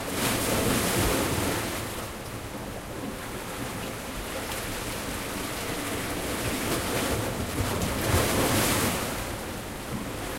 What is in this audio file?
Wave Mallorca 10 IBSP2
16 selections from field recordings of waves captured on Mallorca March 2013.
Recorded with the built-in mics on a zoom h4n.
post processed for ideal results.
athmosphere, field, field-recording, mallorca, mediterranean, nature, recording, water, waves